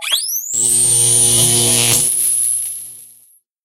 Electrical Shock (Zap)
This is an electric shock sound I made for a webseries from a free sound library.